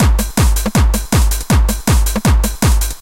duppyHardcore02 160bpm
Quite fast hardcore techno beat with claps and TR909
hardcore, loop, breakbeat, trace, break, 160bpm, beat, drum, techno, tr909, hard